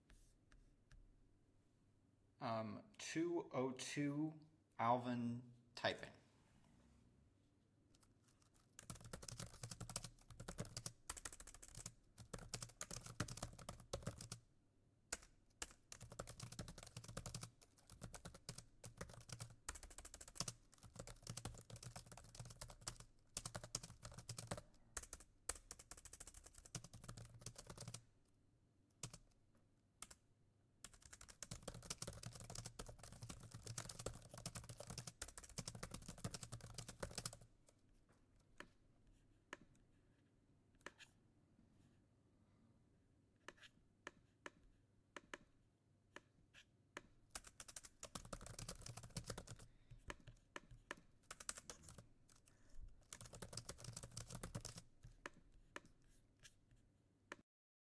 Macbook typing and clicking
Typing and clicking on Macbook laptop
AT4040 into Focusrite Scarlett
click, clicking, clicks, computer, keyboard, laptop, mac, macbook, mouse, touchpad, type, typing